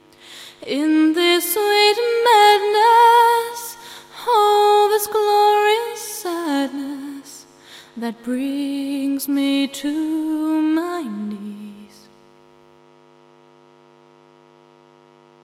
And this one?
This file was created for noise reduction testing using Maximus or other Denoiser tools. I am the editor of Image-Lines manuals and training documents. The 50 Hz electrical hum is audible in isolation at the end of the sample. The file is a remix of a sound by randomroutine and a vocal I recorded of singer - Katy T.